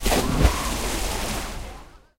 a splash from diving into water
pool, splash, swimming